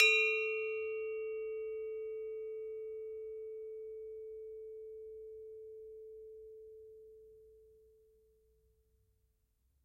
Bwana Kumala Ugal 11
University of North Texas Gamelan Bwana Kumala Ugal recording 11. Recorded in 2006.